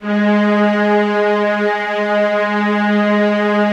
07-synSTRINGS90s-¬SW
synth string ensemble multisample in 4ths made on reason (2.5)
synth, strings, g